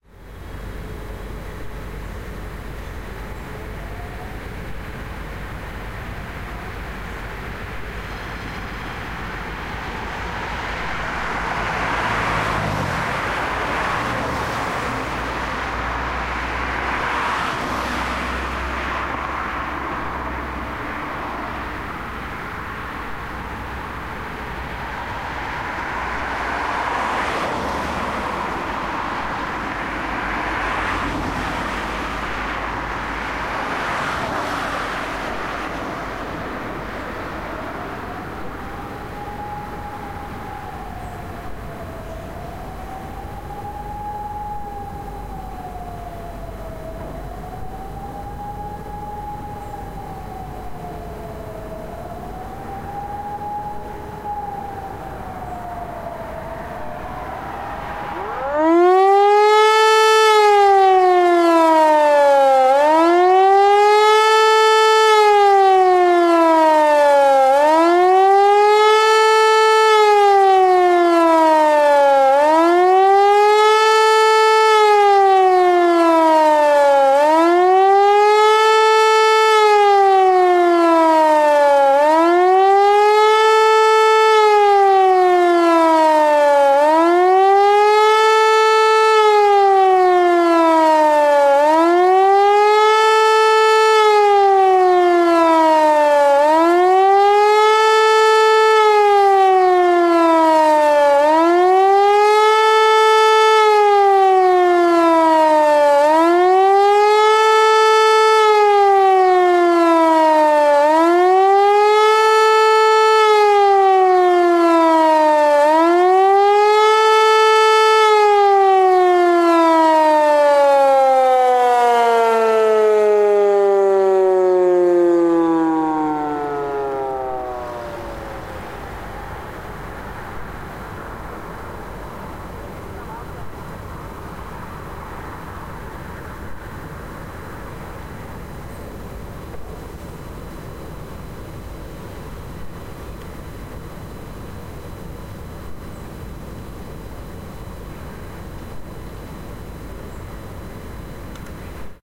HLS273 Sirene Signal: Warnung
HLS273 Sirene von Hörmann. Aufgenommen in Köln-Niehl bei der Sirenenprobe vom 10.10.2015 im Raum Köln.
Signal: Warnung. Aufnahme über Kompressorfunktion verstärkt.
HLS273 mechanical Siren manuf. by Hörmann. Recorded in Cologne-Niehl at the big siren-testing in october 2015.
Signal: Warning. Recording was amplified using the compressor-function.
siren, civil, mechanical, raid, disaster, sirene, emergency, warning, signal, defense